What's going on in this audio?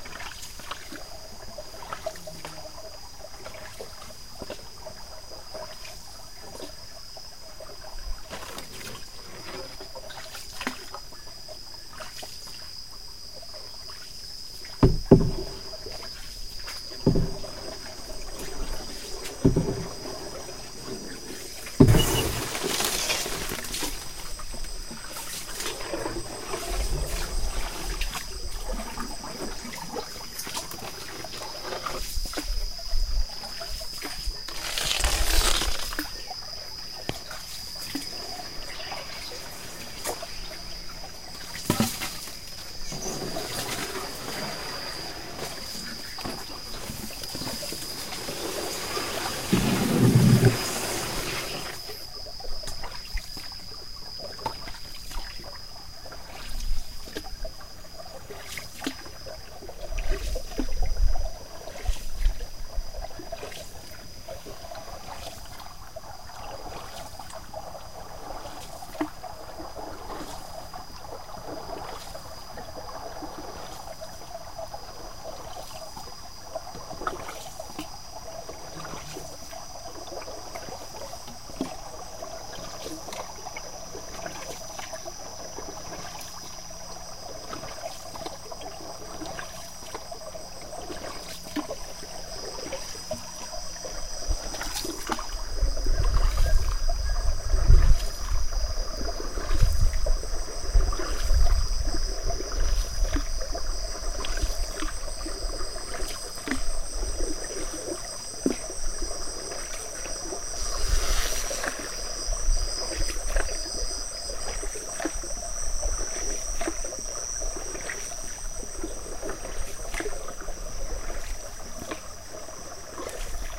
canoe in flooded forest
Sitting in an indio-canoe riding through the flooded rainforest and listening to the sounds of nature. Sony-datrecorder.